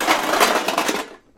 aluminum cans rattled in a metal pot